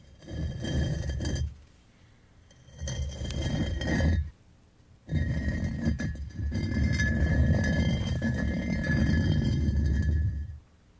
concrete blocks moving1
Concrete blocks moved on top of one another. Sounds like a stone door moved. Use this sound to enter the secret chamber of your pyramid.
Recorded with AKG condenser microphone to M-Audio Delta AP soundcard
effect, stone, concrete-block, grinding